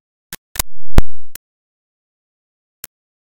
created by importing raw data into sony sound forge and then re-exporting as an audio file.
harsh, glitches